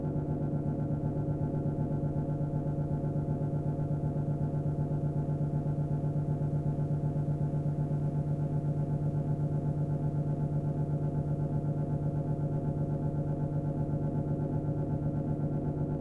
0846 Synthesizer DI'd into Sony Recorder
This recording was from an audio signal plugged into a Micro KORG and then tweaked a bit to create something completely new. And Arp was added to keep the audio to continuously play while being adjusted with the KORG and while recording into a Sony ICD-UX560F for finding anything worth uploading.